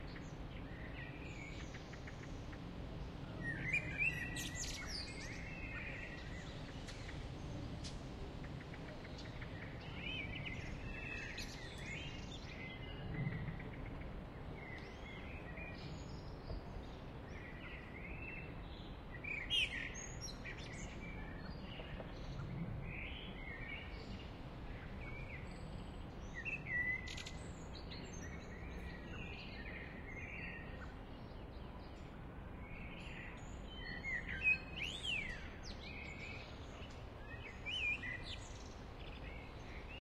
garden mixdown
summer garden birds adapted from:
birds, garden, summer